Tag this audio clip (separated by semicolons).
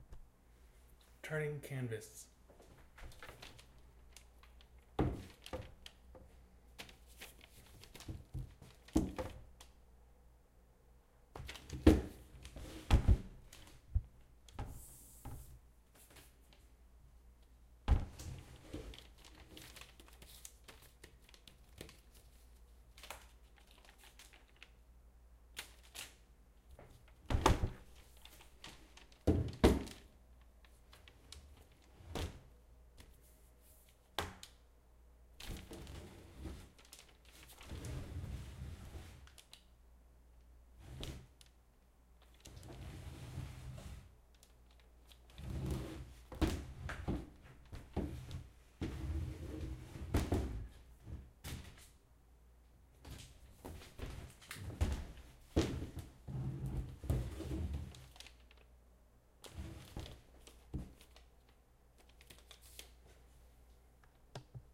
AudioDramaHub; poster; frame; painting; canvas; foley